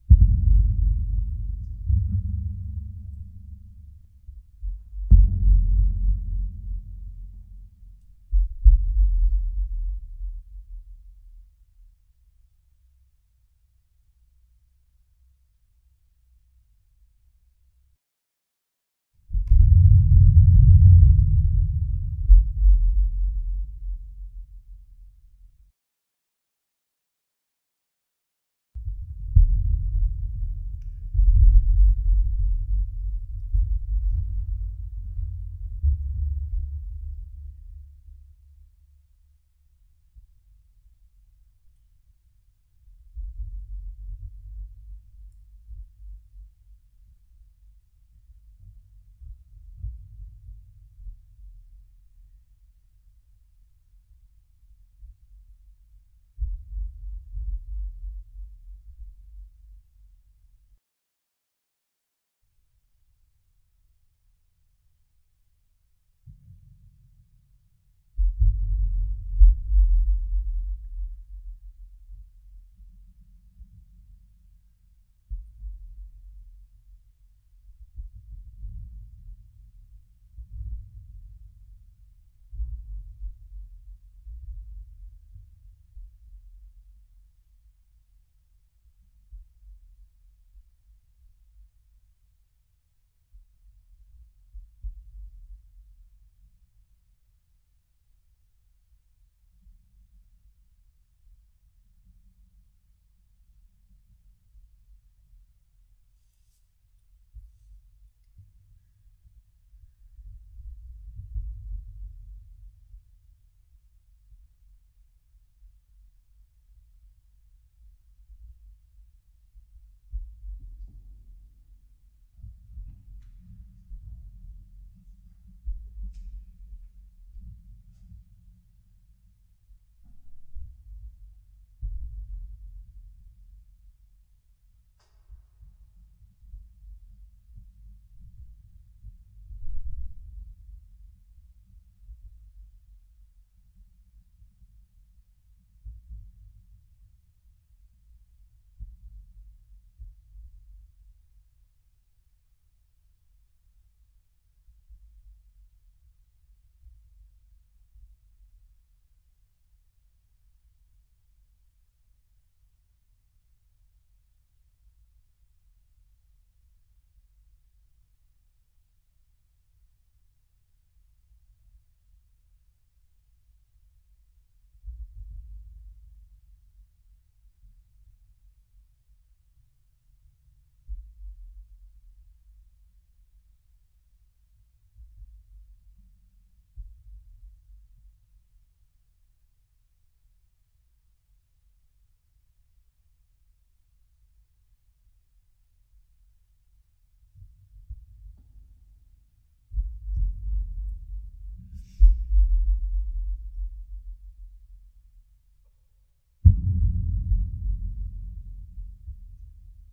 Bassy Ambient Sounds/Hits
A few noises created by hitting the mic and its surrounding surfaces, with a big boost of bass, normalised and reverbed. Created by Hjalmar for Red Moon Roleplaying.
ambient, bass, bassy, boom, dark, hits, low, noises